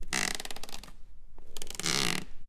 Bench Creak 3
Sound of an old wooden bench creaking
Creaking, Tree, Wooden